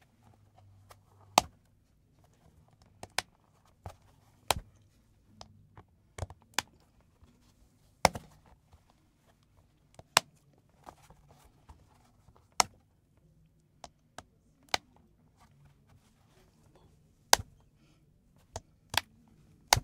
Open and close a push button.
4maudio17, button, openpushbutton, pressure, uam